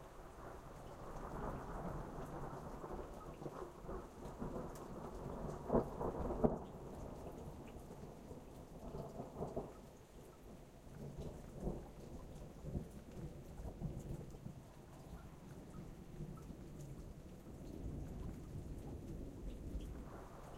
outside-thunder-1
Distant thunder against a lightly rainy backdrop.
Recorded on a Tascam DR-07. Made into a tuned loop using Image-Line Edison -- no other processing was done on this file. Please note the recording levels are really low.
thunderstorm, suburb, california, atmosphere, rain